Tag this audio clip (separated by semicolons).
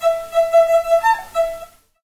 violin; barouque; noisy; loop; classical